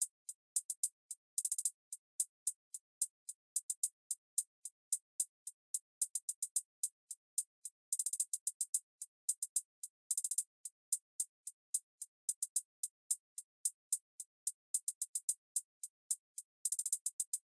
Hi-Hat Loop 7 (110 bpm)
Hi-Hat loop at 110 bpm. Good for hip-hop/rap beats.
hihat-loop, loop, hi-hat, hat-loop, beat, hat, hi-hat-loop, hihat